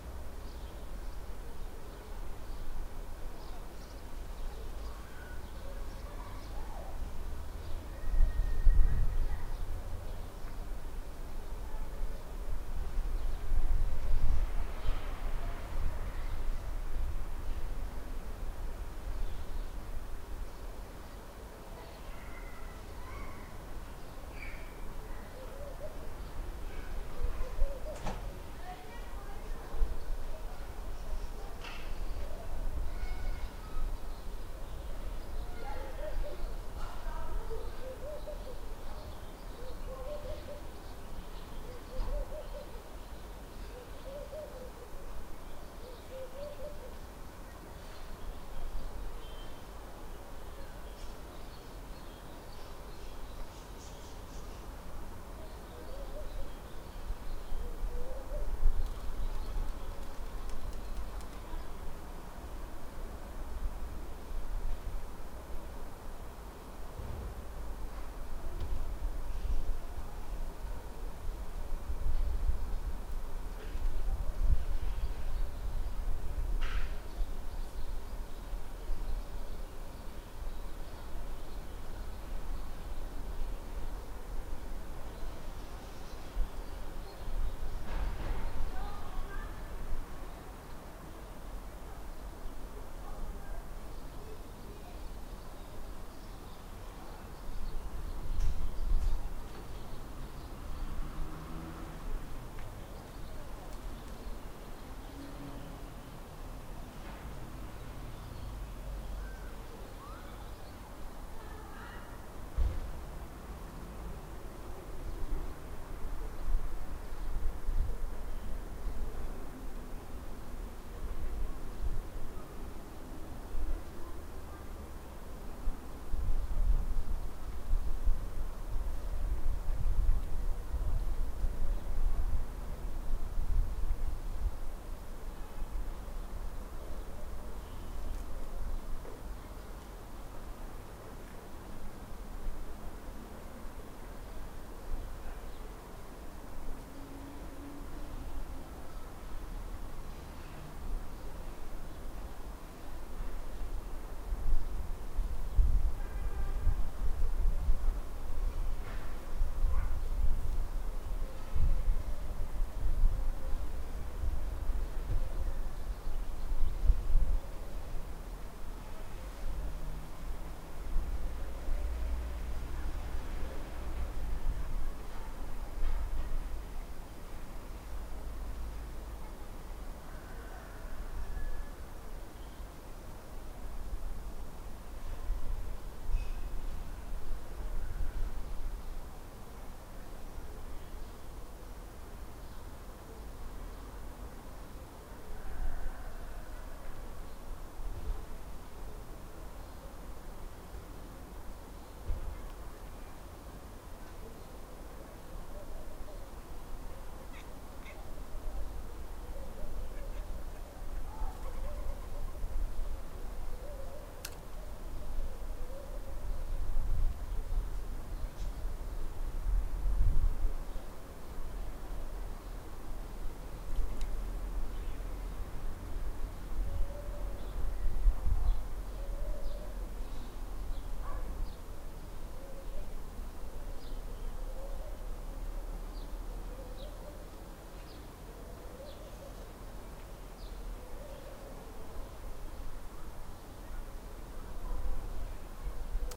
field recording in Bursa
The sound of a street in Bursa City. Ambience of my apartmen's roof.